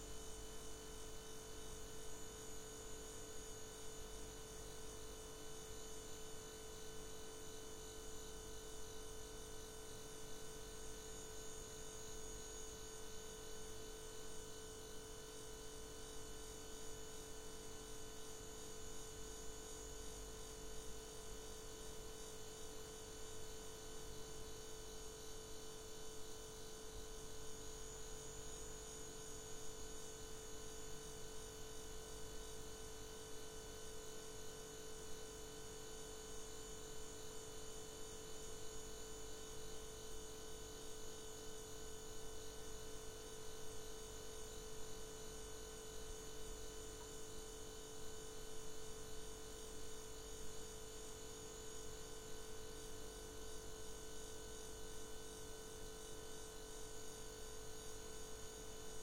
kitchen refrigerator working
fridge buzzing in the kitchen (int.)
refrigerator, working, kitchen, fridge, buzz